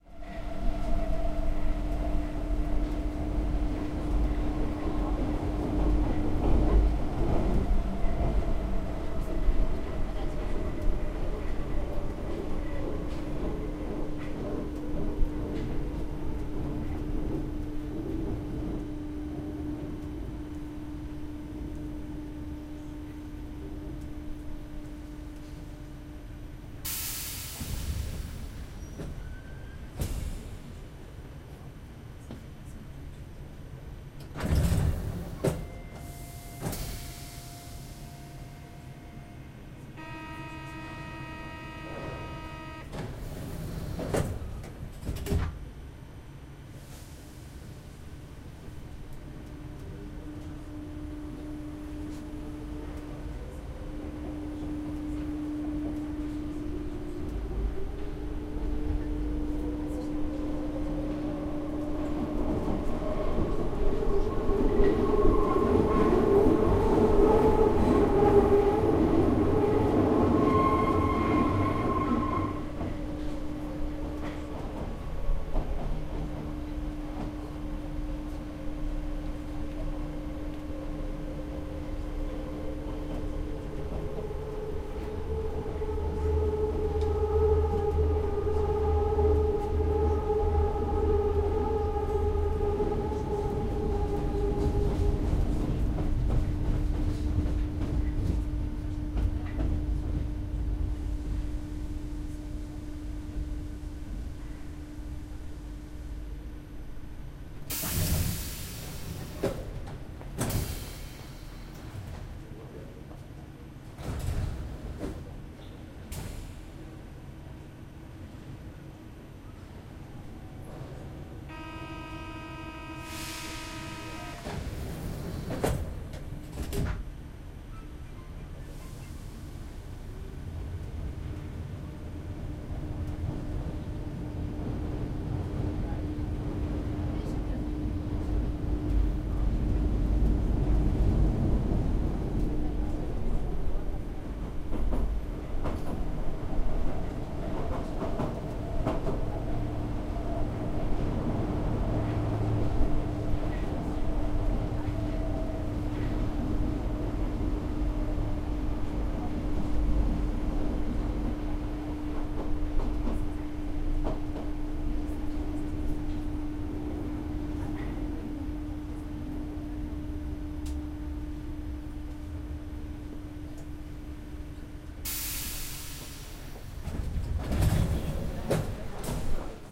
paris subway 02
On the 7 line of the Paris Metro, riding from the Sully Morland station to the Censier Daubenton station, facing the back of the subway car
Recorded on 7 June 2011 with a Zoom H4. No processing.